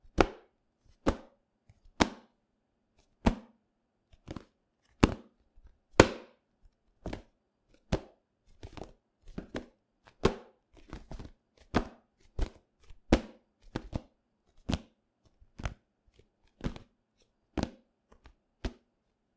Tissue Box Catching
Fidgeting with a cardboard box of tissues. Recorded with a Samson Meteor.
box, cardboard, catch, catching, fidget, fidgeting, finger-drum, fingerdrum, finger-drumming, fingerdrumming, hold, holding, impatience, impatient, throw, throwing, tissue-box, tissues